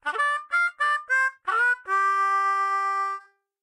Harmonica Glassando Key Of C 01
Harmonica rift I played during an improv practice.
glassando, rift, Harmonica